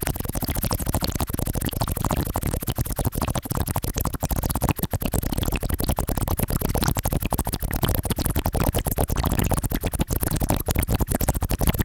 Water bubbles loop
Water bubbles (or lava, if slowed down) recorded with H1Zoom. I'd appreciate a comment if you use it. Love!
bubbling, slurping, underwater, bubbly, liquid, boiling, chemical, fizzy, gas, potion, water, looping, bubbles, submerged, carbonated, acid, loop, lava, bubble